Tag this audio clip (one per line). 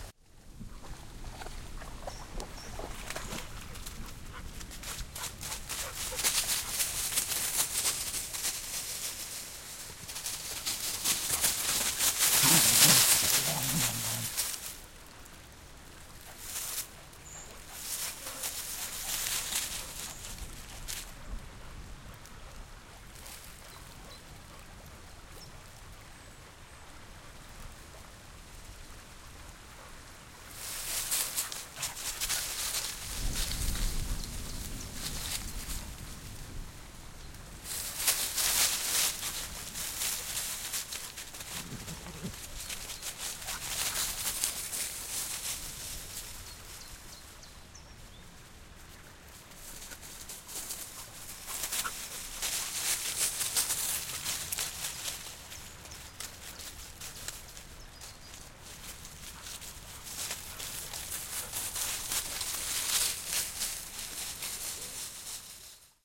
dogs
forrest
jarama
leaf
madrid
river
run
spring
water